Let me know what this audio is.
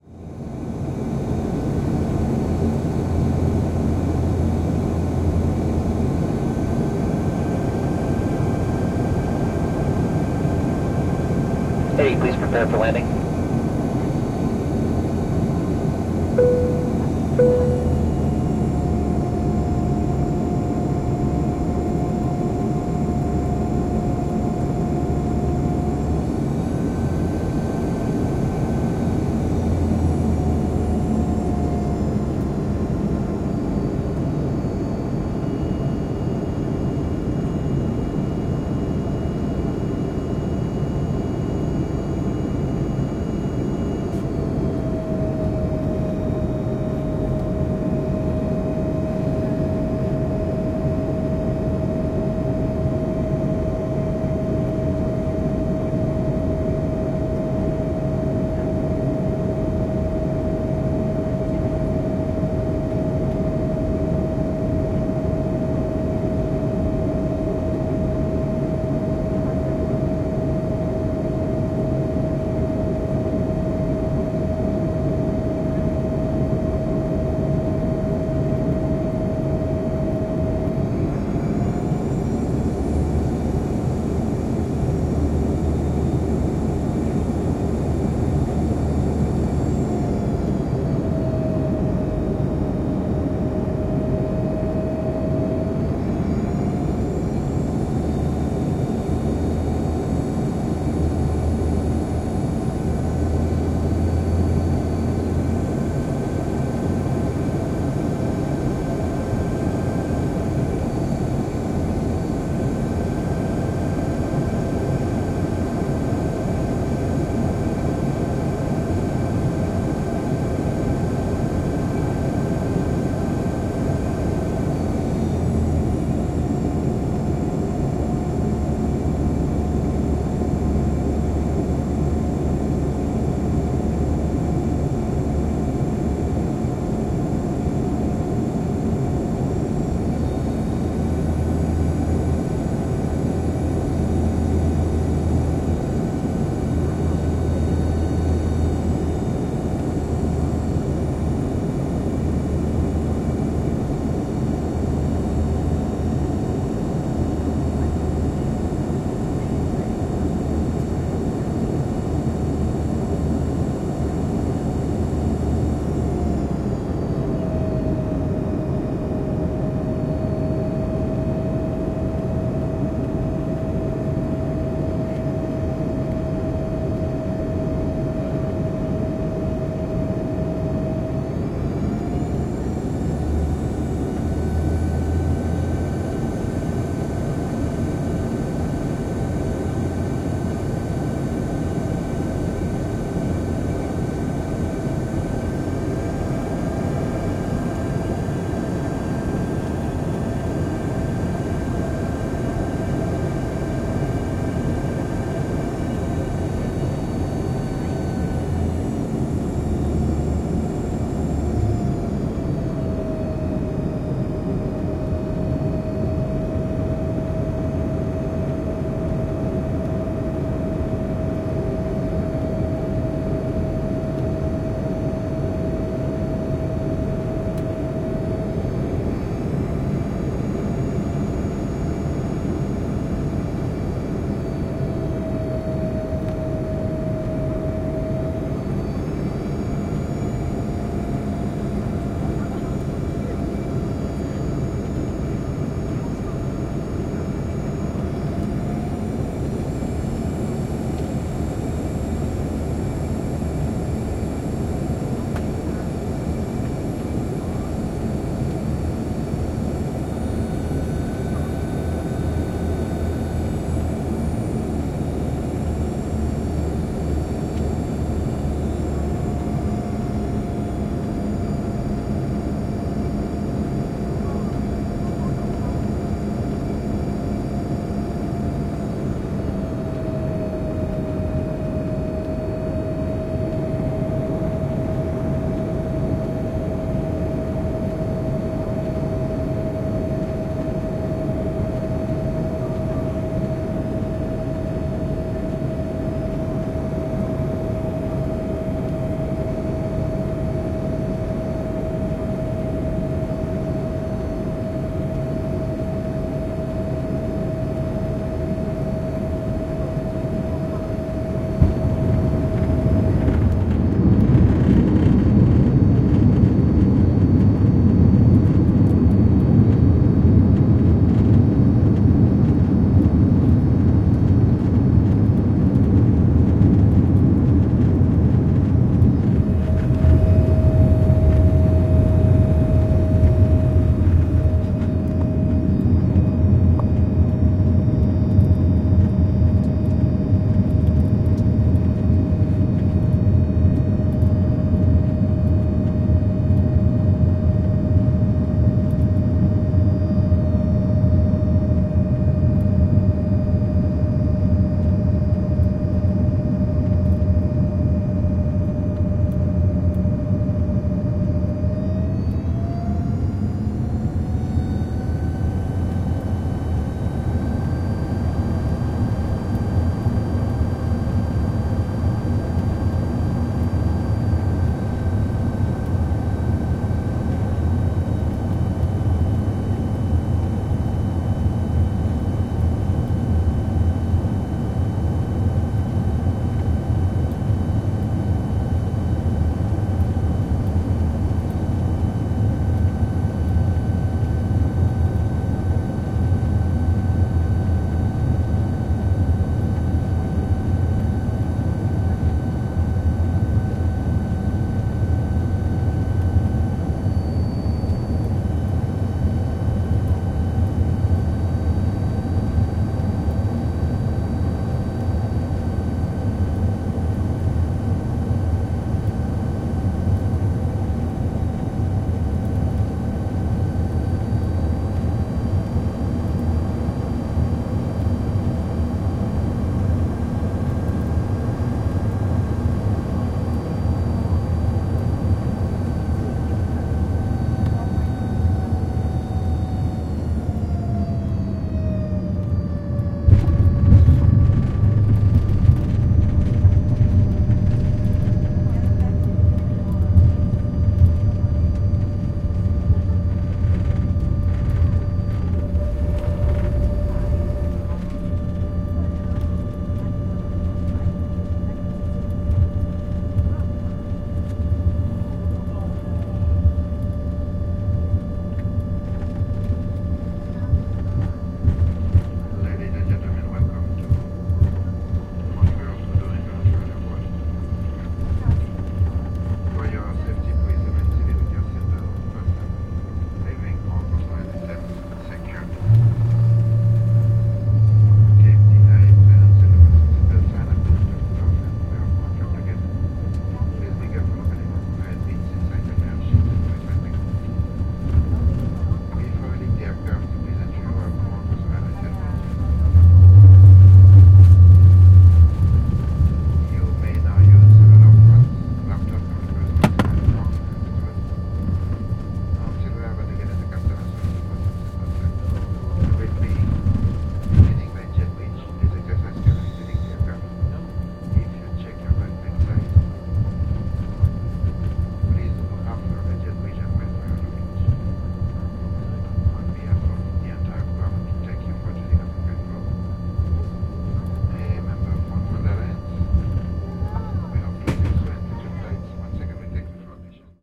Embraer 175: Approach and Landing
Embraer 175 initial descent, runway approach, landing and taxiing. Engines cut out at 7:20. Plane lands at 7:23.
plane, engines, buzz, wheels, land, anding, impact, descent, approach, taxiing, airliner, airplane, rumble, jet, embraer, taxi